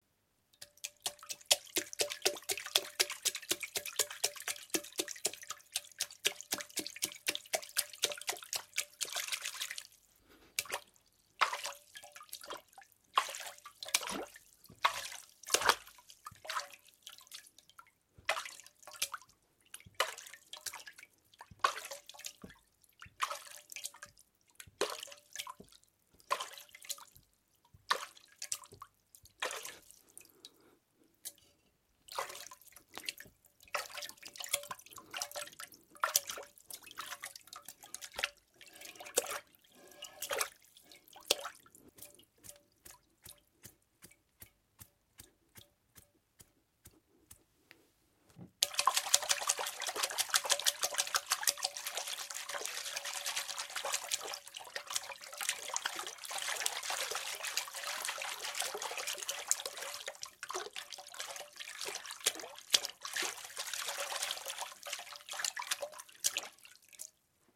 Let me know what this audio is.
Splashes and drips
Set of water sounds we made for our sound library in our studio in Chiang Mai, North Thailand. We are called Digital Mixes! Hope these are useful. If you want a quality 5.1 or 2.1 professional mix for your film get in contact! Save some money, come to Thailand!